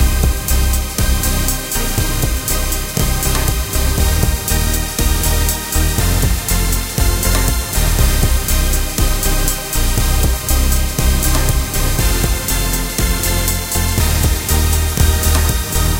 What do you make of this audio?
New-generation-synth-loop-120
electro, rave